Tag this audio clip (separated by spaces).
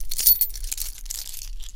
keys shake